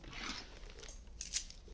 Picking up some quarters from the floor then holding them in my hand.